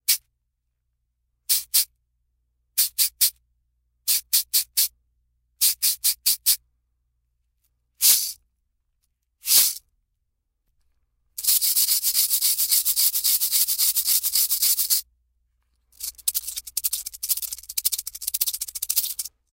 A handful of different sounds from a small cabasa.
rhythm, percussion, musical-instrument, cabasa